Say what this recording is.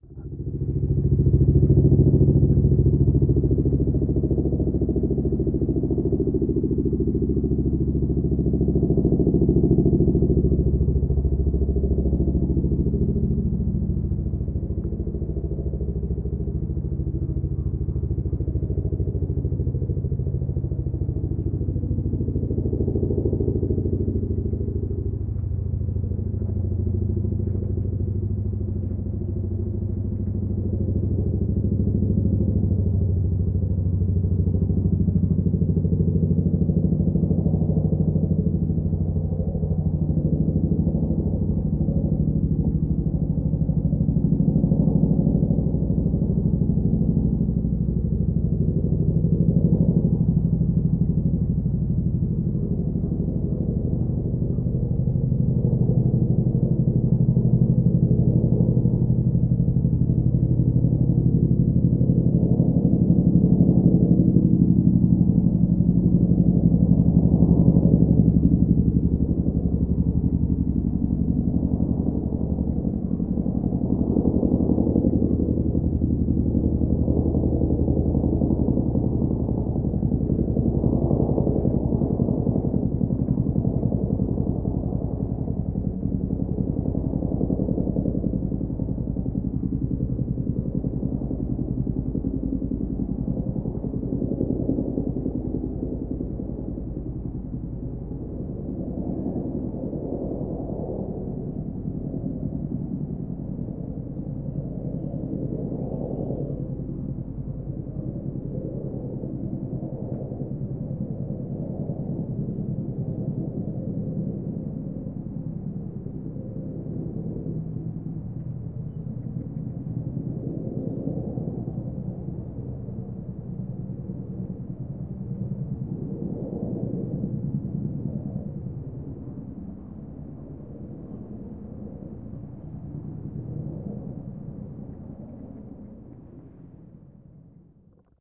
Chinook High
A stereo field recording of a Royal Air Force Boeing CH-47 Chinook flying at about 3,000 feet and about 1 kilometre away. Zoom H2 rear on-board mics.
air-force, chinook, field-recording, helicopter, high, military, royal-air-force, stereo, xy